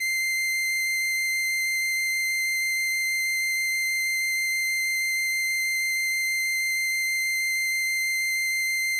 Transistor Organ Violin - C7
Sample of an old combo organ set to its "Violin" setting.
Recorded with a DI-Box and a RME Babyface using Cubase.
Have fun!
analog, analogue, electric-organ, electronic-organ, strings, vibrato